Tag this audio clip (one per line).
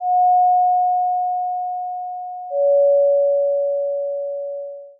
bell
church
ding
dong
door